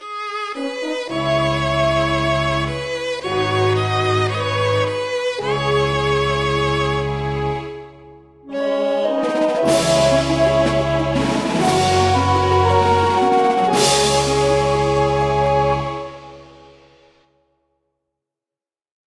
Some little piece of music that I composed a while ago, with the plan to extend it, which has not yet happened.